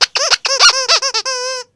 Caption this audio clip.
Squeaky Toy 2
Made by squeezing a squeaky toy
funny, garcia, mus152, sac, sound-effect, toy